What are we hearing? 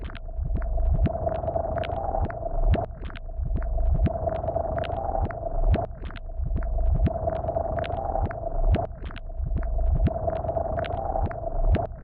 One in a small series of odd sounds created with some glitch effects and delays and filters. Once upon a time these were the sounds of a Rhodes but sadly those tones didn't make it. Some have some rhythmic elements and all should loop seamlessly.
experiment, noise